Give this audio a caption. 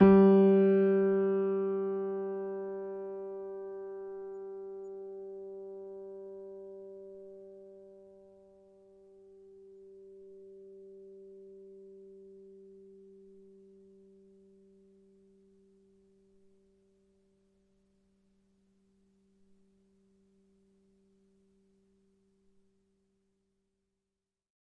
upright choiseul piano multisample recorded using zoom H4n